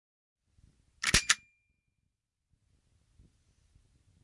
Pistol dry fire
Shooting my airsoft pistol while empty.
Dry-fire, Gun, Pistol